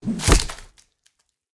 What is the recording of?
Chop Off Head With Axe
Made this for a play. Someone off-stage lost their head.